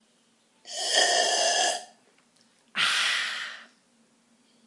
Might be useful when a character wants to slurp a drink, expressing a delightful relief or taste.